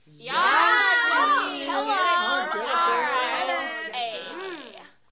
Cheer 4 yas kween get it girl
Small audience cheering with a "yas kween" attitude.
audience, cheer, crowd, group, studio, theater, theatre, yaskween